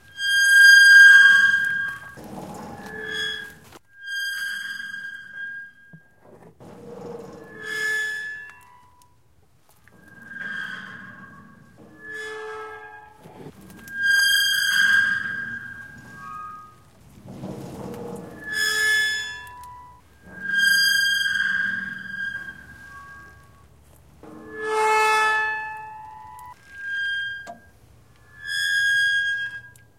Opening and closing a squealy tractor door.
tractor, metal, rusty, door, squeal